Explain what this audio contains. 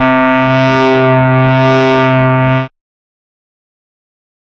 Alien Alarm: 110 BPM C2 note, strange sounding alarm. Absynth 5 sampled into Ableton, compression using PSP Compressor2 and PSP Warmer. Random presets, and very little other effects used, mostly so this sample can be re-sampled. Crazy sounds.
atmospheric, bounce, club, dark, effect, electro, electronic, glitch-hop, hardcore, house, noise, pad, porn-core, rave, resonance, sci-fi, sound, techno, trance